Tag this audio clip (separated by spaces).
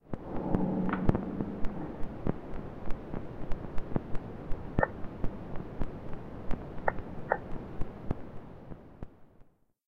guitar tension vinyl